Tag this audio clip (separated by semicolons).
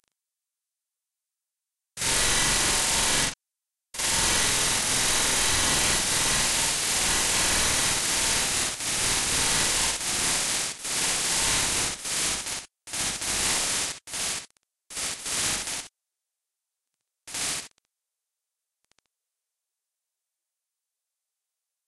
atmosphere; dry; fx; hollow; insects; minimal; minimalistic; noise; raw; sfx; silence